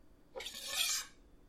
knife pick up 01
picking up a knife from off a table
blade, knife, slide